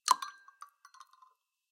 plastic item fell in a glass of water2
drop, fall-in-water, water